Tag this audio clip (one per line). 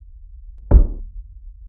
Body
Bump
thud